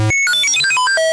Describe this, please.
Generated Using Fibonacci Sequence on Chuck Programming Language.